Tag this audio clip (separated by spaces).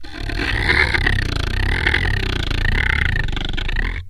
daxophone friction idiophone instrument wood